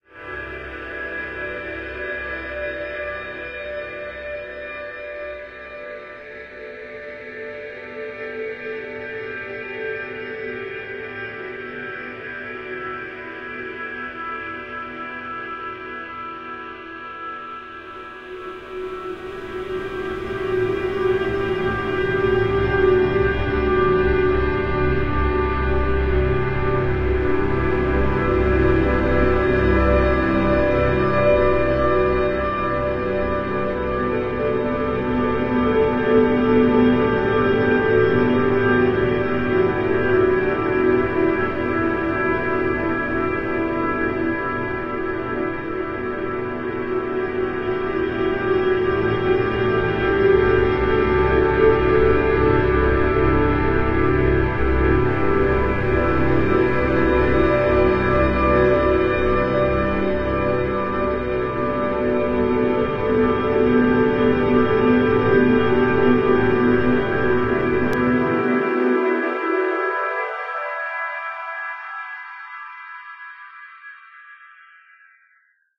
Ambient Wave 4 (Stretched)
echo, loop, ambient, stretch, piano, extreme, ambience, pauls